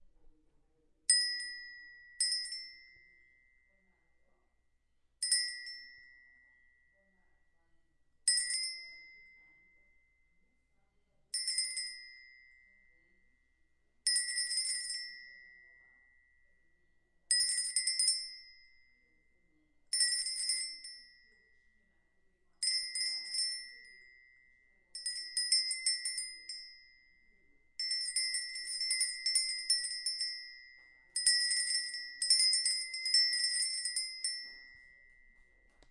Cow Goat Bell Vaca Carneiro Sino Polaco
Vaca, Bells, Polaco, Cow, Carneiro, Sino, Bell, Goat